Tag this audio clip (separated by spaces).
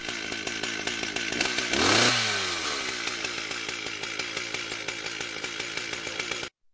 saw sawing